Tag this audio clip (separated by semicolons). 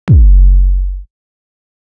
House; Drum; Electronic; Bass-Drum; Sample; Drums; Kick; EDM